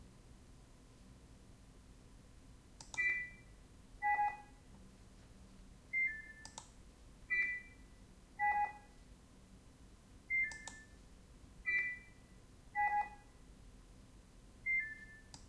robot sensor sounds